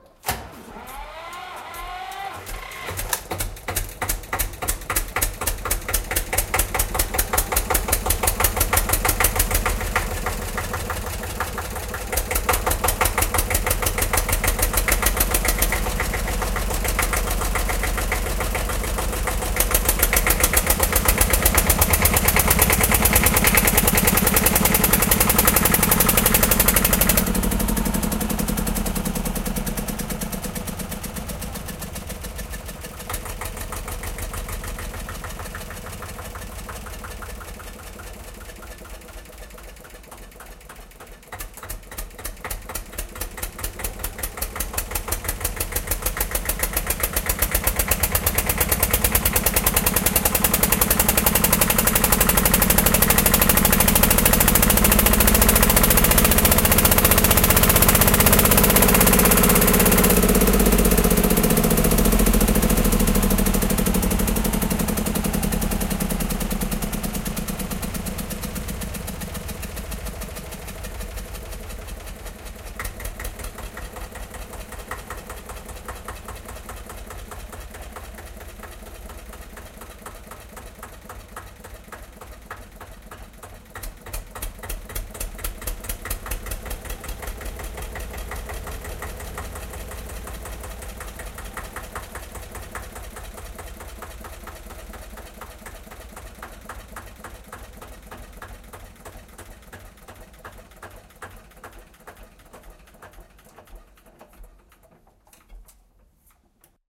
Porsche Allgaier 1957 12 hp
the sound of a Porsche Allgaier tractor from 1957 with 12 hp, recorded in a barn using a Roland R-05 recorder
old, Porsche, 1957, Allgaier, engine, tractor, sound-museum